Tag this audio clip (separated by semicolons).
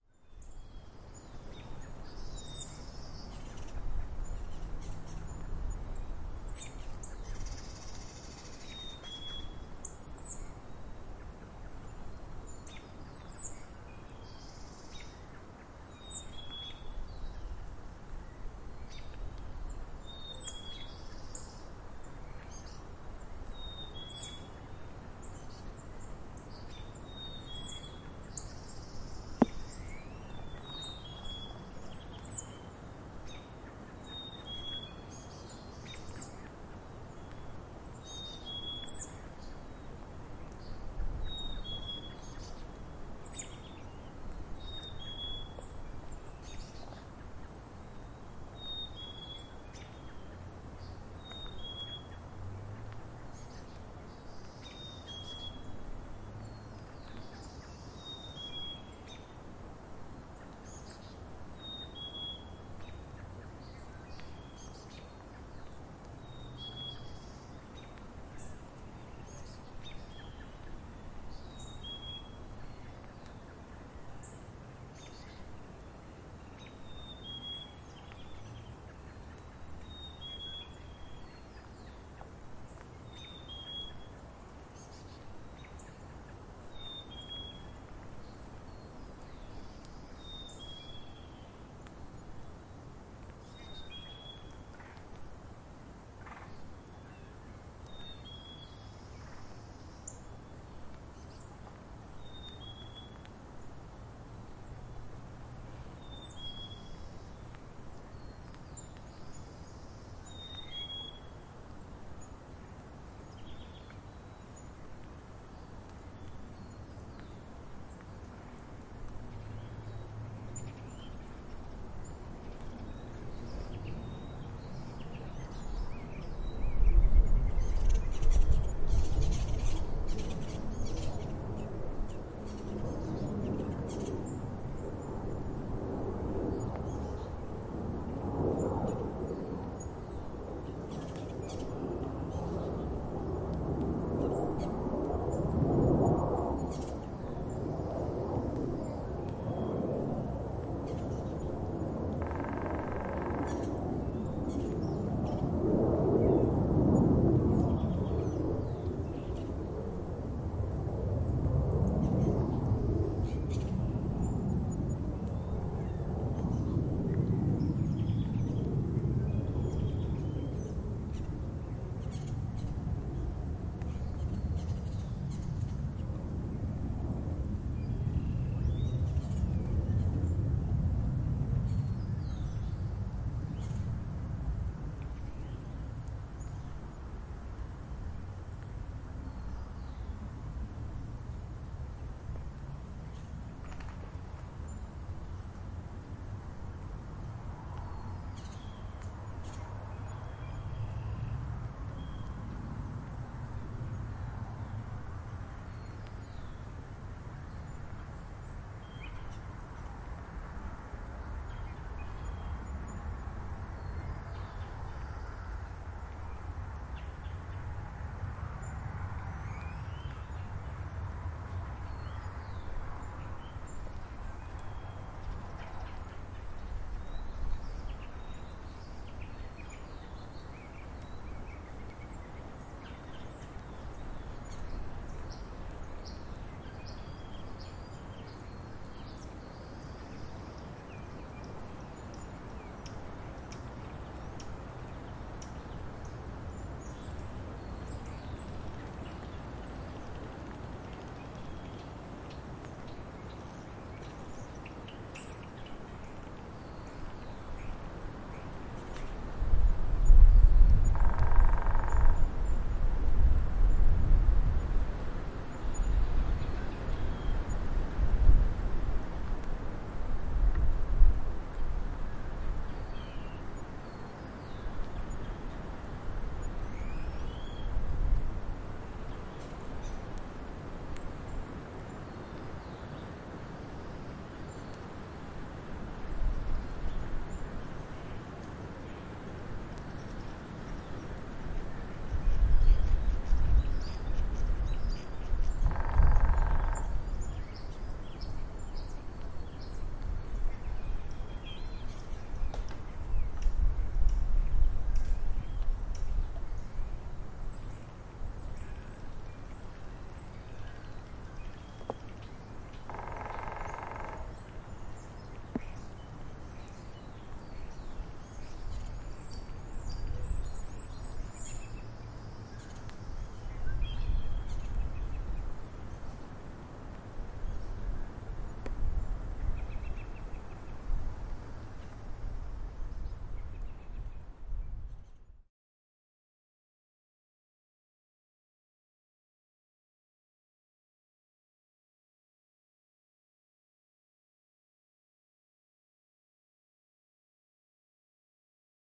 Ambience; ambient; birds; effects; field-recording; fx; lake; nature; sound